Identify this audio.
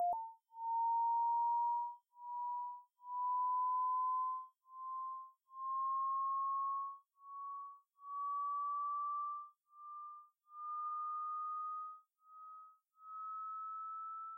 CARDOT Charlotte 2018 son1
This sound is a synthetic sound. I got this sound by generating noise and tone.
effect, intro, sound